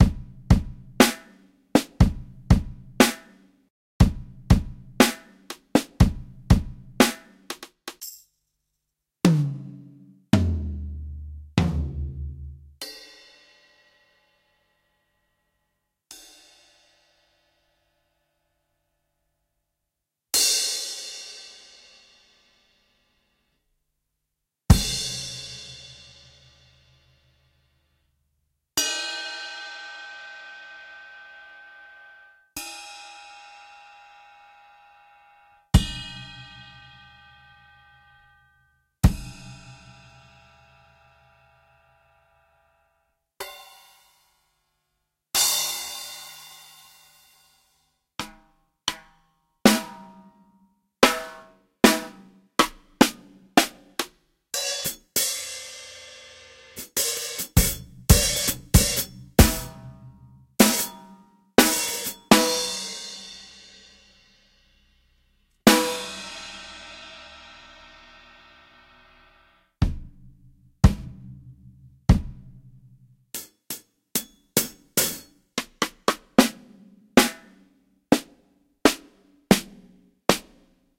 acoustic drumkit single hits